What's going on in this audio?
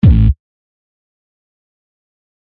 Digi heart
909 BD distorted
harsh, digital, fx